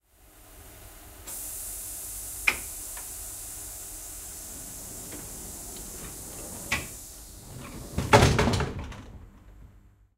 Tilt Train Door Close 2B
Recording of a pneumatic door closing on a tilt train.
Recorded using the Zoom H6 XY module.
close, sliding, mechanical, machine, button, pneumatic, closing, door, train